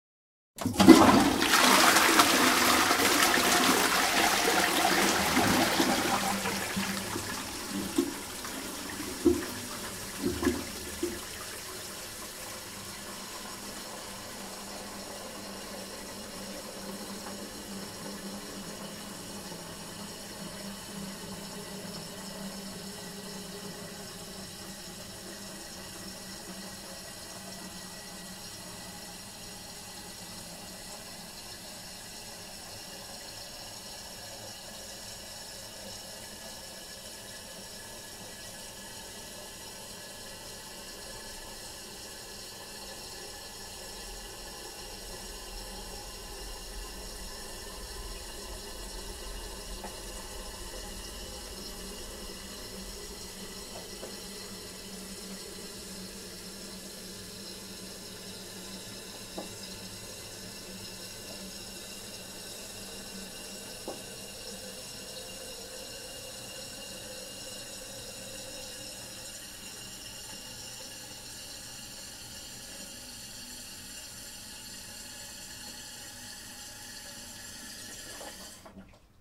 Wasser - Toilettenspülung
toilet, field-recording, flushing